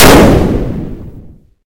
Heavy weapon 001 - Single shot
Some sort of heavy gun firing. Created with audacity from scratch.
fire, firing, gun, shoot, warfare, weapon